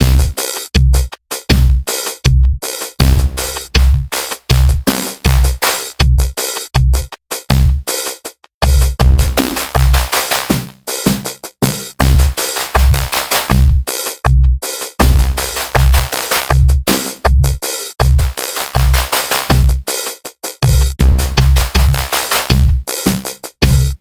80 bpm ATTACK LOOP 2a mastered 16 bit
This is a drumloop at 80 BPM which was created using Cubase SX and the Waldorf Attack VST drumsynth.
I used the acoustic kit preset and modified some of the sounds.
Afterwards I added some compression on some sounds and mangled the
whole loop using the spectumworx plugin. This gave this loop a lofi vocoded sound.
80bpm, drumloop, lofi, phased, spectralised, vocoded